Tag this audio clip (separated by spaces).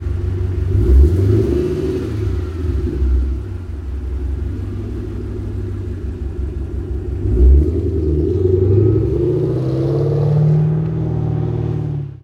mkh60 car GT500 starting engine passing-by mustang stopping fast drive